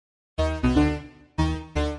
synth dramatic 01
sounds, melodramatic, synth